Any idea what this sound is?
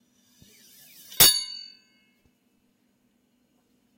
Sharpening Knife
Sharpening a knife.